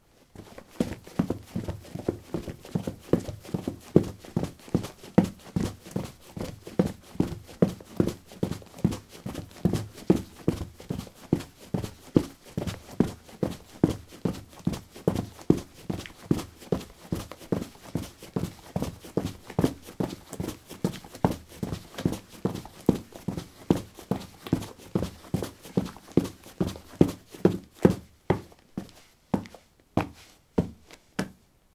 concrete 15c darkshoes run
Running on concrete: dark shoes. Recorded with a ZOOM H2 in a basement of a house, normalized with Audacity.
footsteps, step, footstep, steps